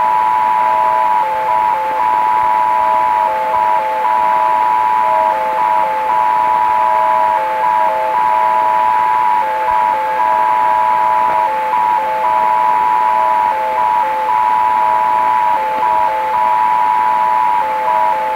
A loop made from a shortwave data transmission.